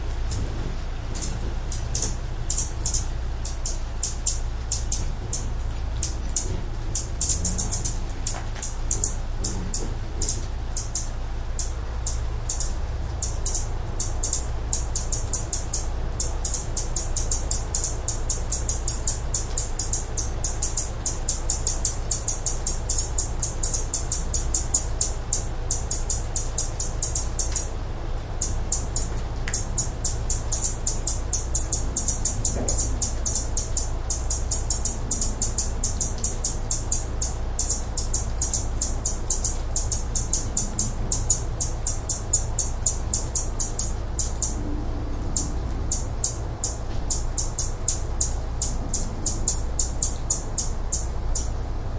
Hummingbird Chirps
Hummingbird chirping at dawn in the backyard. Recorded on a Sony PCM-M10.